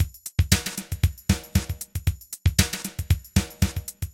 renu 01 116bpm
beat, loop, house, nujazz